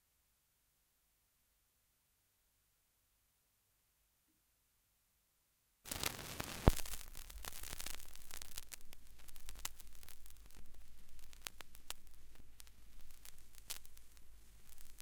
Noisy LP Start #1
The sound of a needle hitting the surface of a vinyl record.
record, album, needle, vintage, static, noise, hiss, surface-noise, pop, start